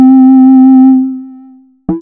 Basic triangle wave 2 C4
This sample is part of the "Basic triangle wave 1" sample pack. It is a
multisample to import into your favorite sampler. It is a really basic
triangle wave, but is some strange weirdness at the end of the samples
with a short tone of another pitch. In the sample pack there are 16
samples evenly spread across 5 octaves (C1 till C6). The note in the
sample name (C, E or G#) does indicate the pitch of the sound. The
sound was created with a Theremin emulation ensemble from the user
library of Reaktor. After that normalizing and fades were applied within Cubase SX.
basic-waveform, experimental, multisample